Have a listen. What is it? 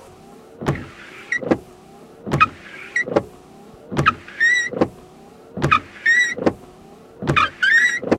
New car windshield wiping sounds. Slower variation. Squeaky. Seamless loop.
Recorded with Edirol R-1 & Sennheiser ME66.